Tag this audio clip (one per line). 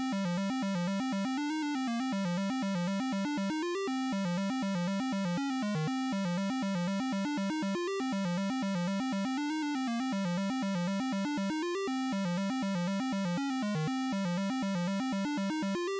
120-bpm
Retro
synth